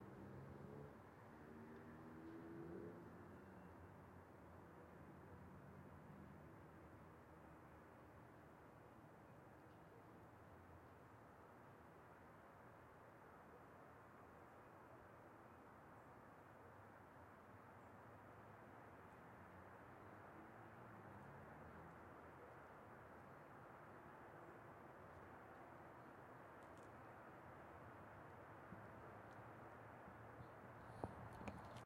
outside ambience during the daytime